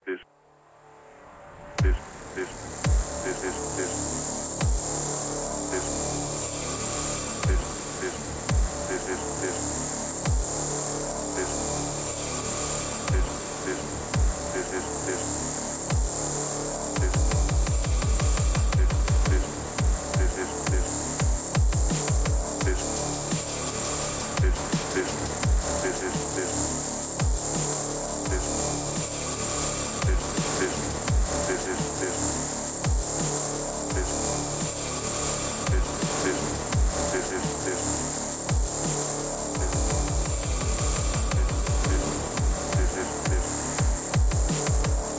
Rolling Stone
Dark keyboards and bass
Darkness, sadness, synth